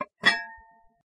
28 biscuit barrel
taken from a random sampled tour of my kitchen with a microphone.